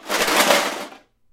aluminum cans rattled in a metal pot